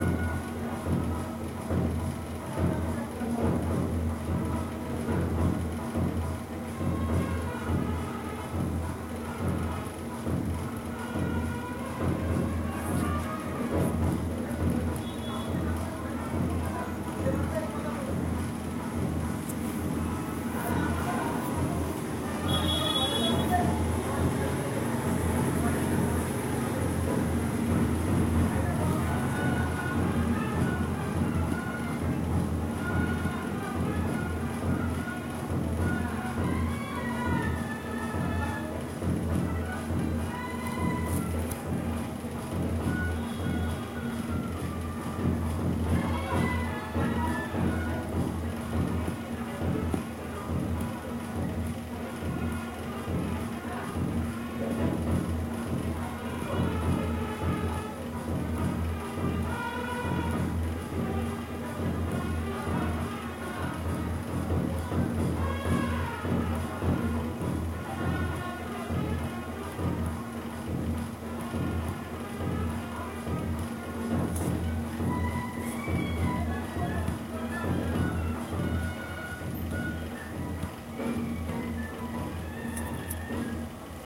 I recorded an wedding processing band party sound in my mobile in kolkata.